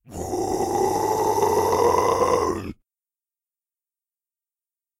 Toni-DeepGrowl
Deep Growl recorded by Toni
voice, growl, deep